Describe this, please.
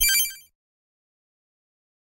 A retro video game reload sound effect.